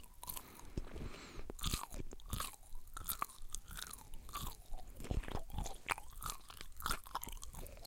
mouth enzymes2
This sound is "grosser" than a porn scene. Too much fluid. Actually this sound really shows off the noise in the small diaphragm condenser compared to the large. You can easily hear the noise on one of the channels over powers the other. Again, this for the experiment of hearing the noise in mics and preamps.
chewing, eating, mouth-enzymes, saliva, smacking